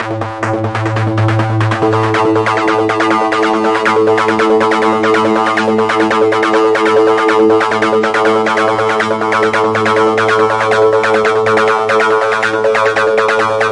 Acid Blip Rize 1 A
ACID BLIP LEAD RIZE A
a, acid, blip, lead, rize